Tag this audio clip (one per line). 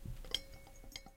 Lantern
Hanging-lantern